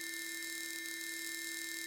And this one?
Radiated Static
Some radiation for your tune.
bend, bending, circuit, circuitry, idm, noise, sleep-drone, squeaky, strange, tweak